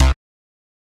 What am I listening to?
Synth Bass 028
A collection of Samples, sampled from the Nord Lead.
nord, lead, bass, synth